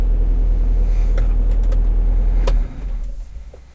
Car engine stop 4
I recently contributed a track to a Triple LP set of krautrock cover versions ("Head Music 2", released by Fruits De Mer Records, December 2020). The song I chose to cover was Kraftwerk's "Autobahn".
If you know the track, you'll know that it uses synthesised traffic sounds alongside recordings of the same. On my version, I achieved these in three ways:
2) I got in my car with my Zoom recorder and made my own recordings of the engine starting, stopping, etc
3) I made my own sound effects using virtual synths and effects in Ableton Live 10
This particular sound falls into the second category.
car, car-engine, car-engine-stop, cars, driving, engine, engine-stop, road, stop, street, traffic